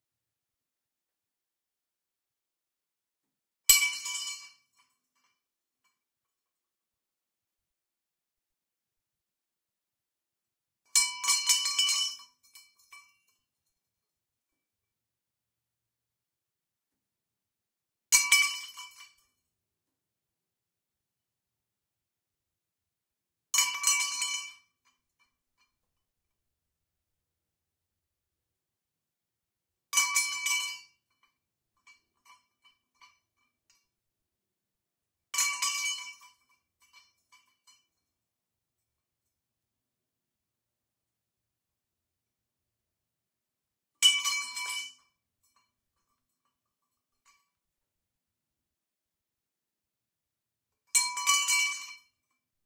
Steel, hollow pipe on concrete, Indoor, 8 impacts
Dropping a hollow steel pipe on a concrete floor.
RAW recording
Recorder: Zoom H6 with XY capsuel
ting, hit, blacksmith, clang, pipe, metallic, rod, impact, metal, foley, strike